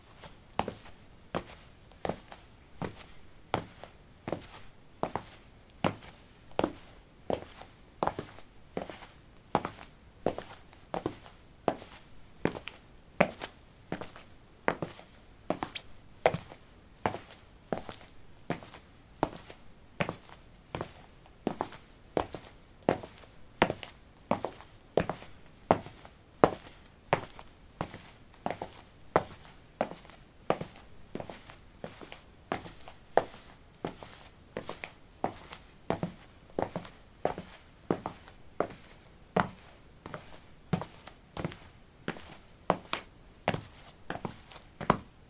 concrete 05a summershoes walk
Walking on concrete: summer shoes. Recorded with a ZOOM H2 in a basement of a house, normalized with Audacity.